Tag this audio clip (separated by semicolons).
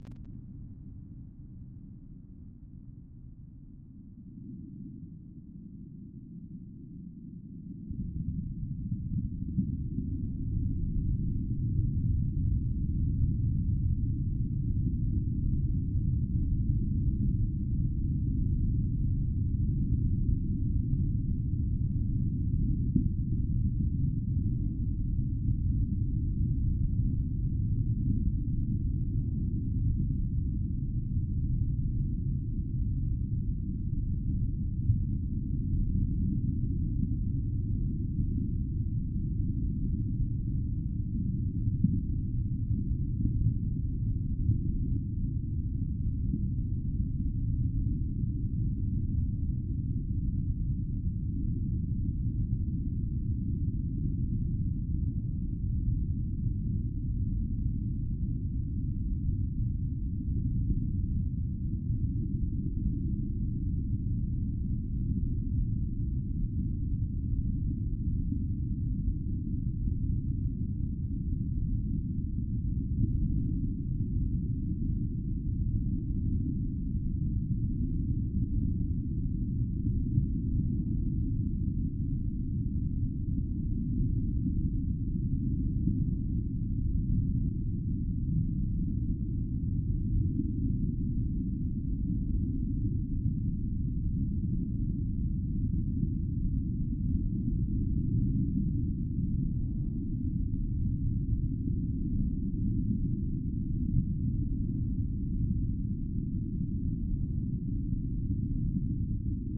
drowning; film; submarine; submerge; swimming; underwater; water